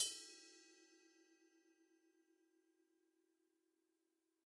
ride bell 2
Drums Hit With Whisk
Drums, Hit, Whisk, With